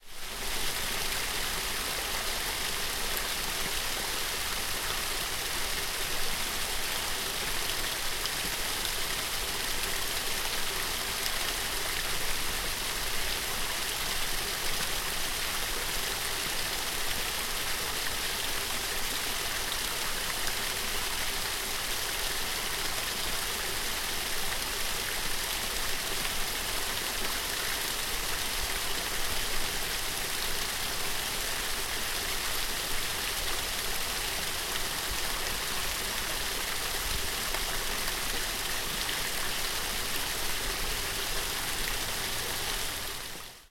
Waterfall, Small, D
Raw audio of a small waterfall by the University of Surrey lake.
An example of how you might credit is by putting this in the description/credits:
The sound was recorded using a "H1 Zoom V2 recorder" on 27th October 2016.
fall, small, stream, water, waterfall